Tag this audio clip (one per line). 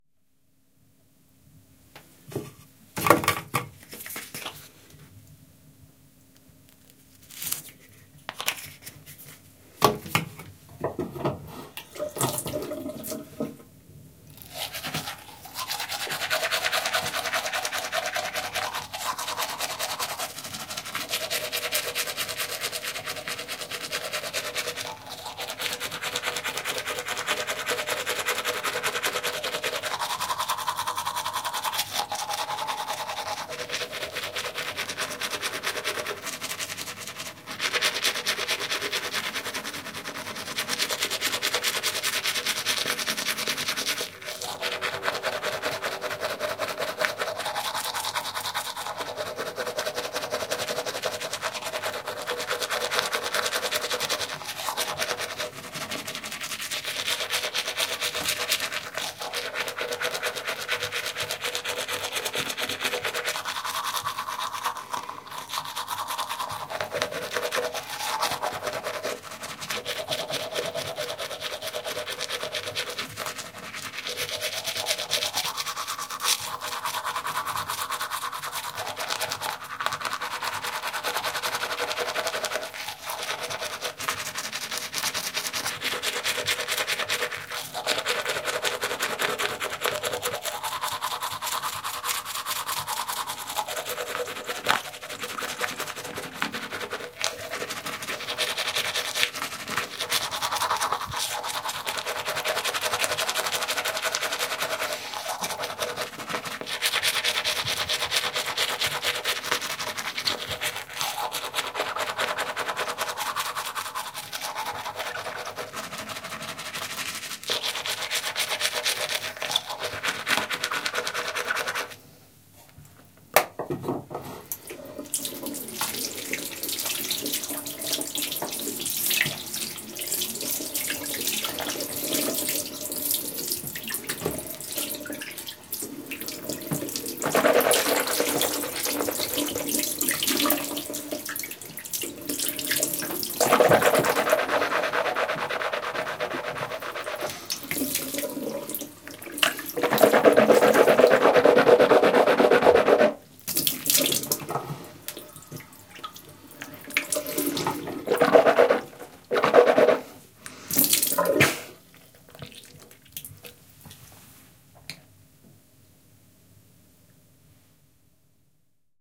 Bathroom
Toilet
Toothbrush
Toothpaste
Tooth
Teeth
Dental
Restroom